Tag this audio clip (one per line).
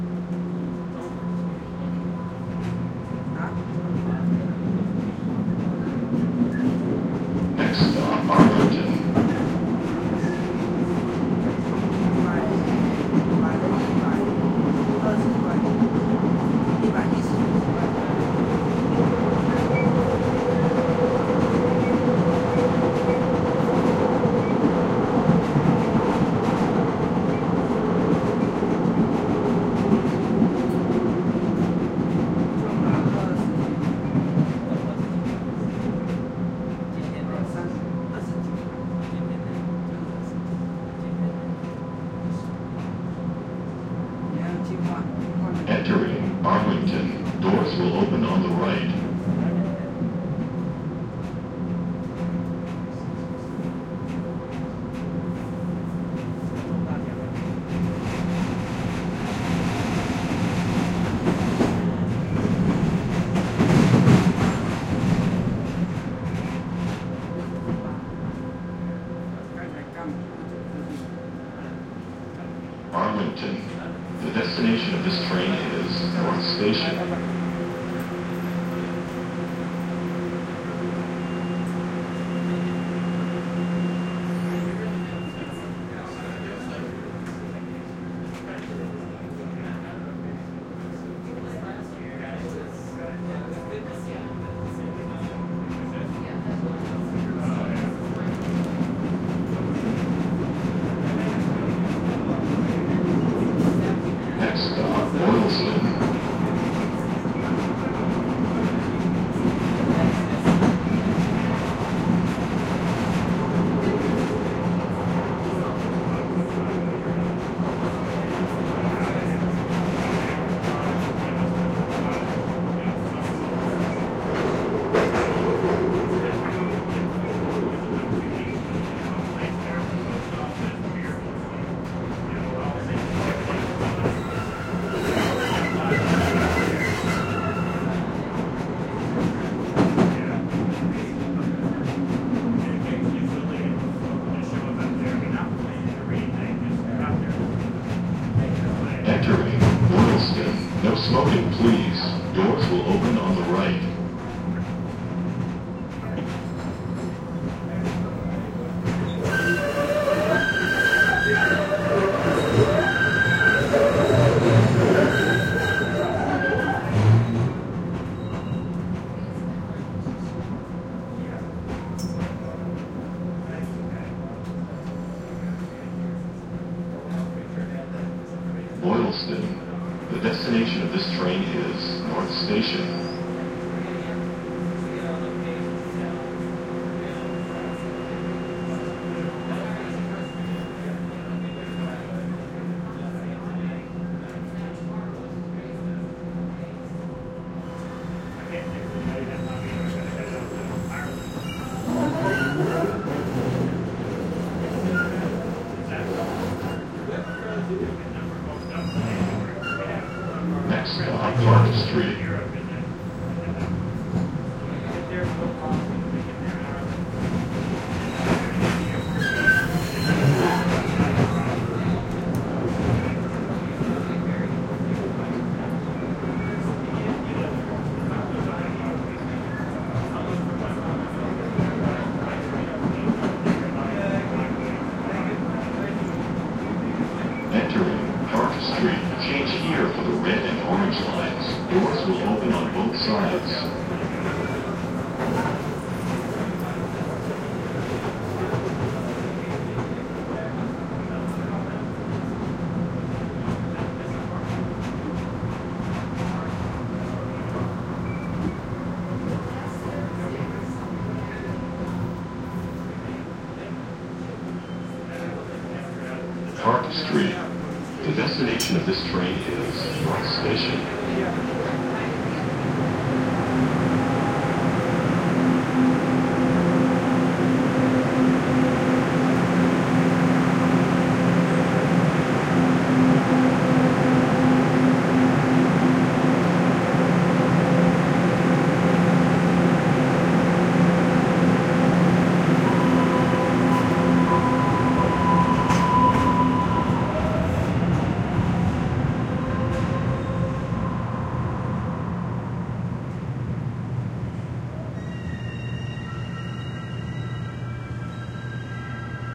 boston
field-recording
mbta
stereo
subway
t
train